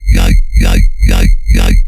A "Yoi" synthesized with NI Massive and played with an F
bass, dubstep, house, electro, complextro, music, yoi